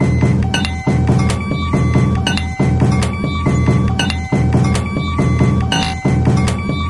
REMIX elements of xavierbonfill
remix of user : xavierbonfill (multi samples):patterns creation with FL STUDIO 9
beat, beats, break, drum, drum-loop, drums, electronic, electronica, experimental, glitch, idm, loop, loops, sample